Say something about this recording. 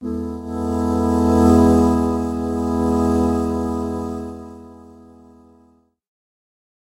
Choir Sting 3
A short choir sting with synthesized female vocalists.
I'd love to see it!
choir, choral, church, cinematic, female, melodic, music, musical, singing, stinger